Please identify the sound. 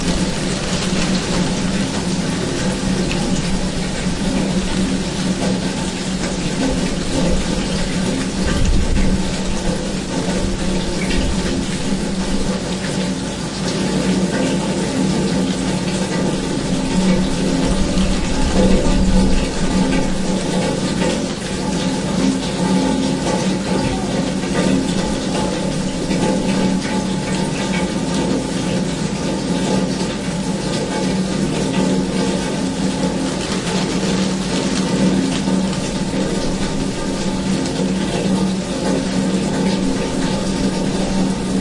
street raining
rain at our street, recorded with two microphones
street, rain, raindrops